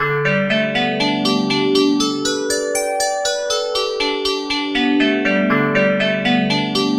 Monochord - arpeggio V4
Homemade monochord tuned to a diatonic scale
Recorded using Reaper and Rode NT1000 microphone
arpeggio, diatonic, harmonic, medieval, melodic, monochord, pythagorus, relax, relaxing, scale, solfeggio, therapeutic, therapy, wooden